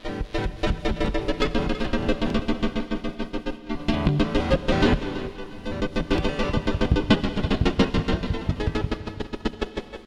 heavily processed electric guitar noises made scraping the strings with a cell phone
noise, guitar, processed